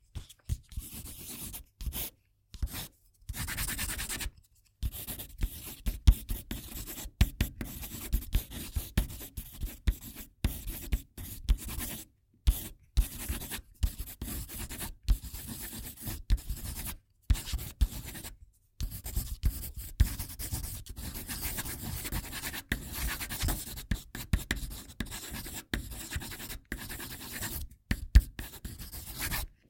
Pencil on paper scribbling.
Recorded with H5 Zoom with NTG-3 mic.